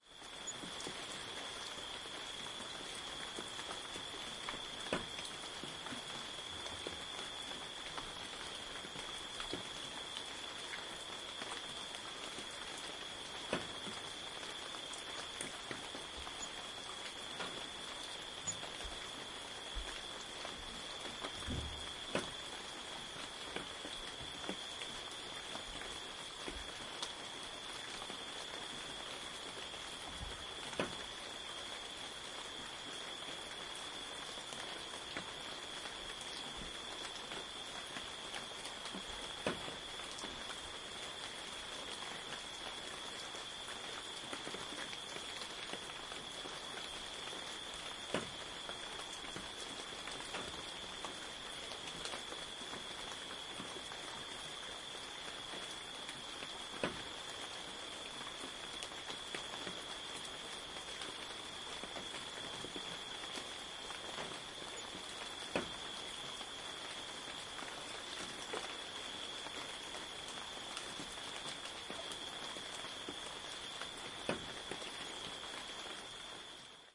Light rain falling at night in Hawaii
An atmos recording of rain falling onto a porch roof at night outside a house in Volcano on the Big Island of Hawaii. Recorded with a Zoom H2N mixing XY and MS. Sounds of creaking of the house faintly in the background.
atmos, field-recording, hawaii, rain, tropical, volcano